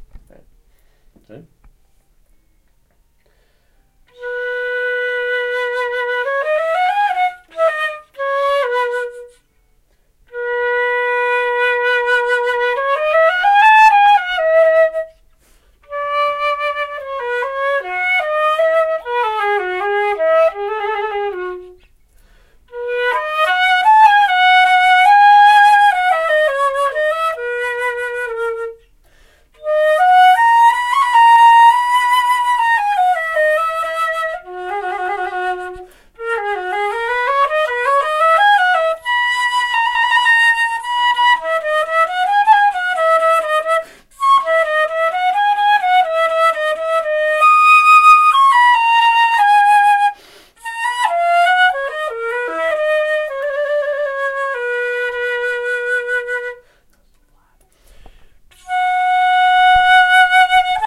Instrumental recording of someone playing the flute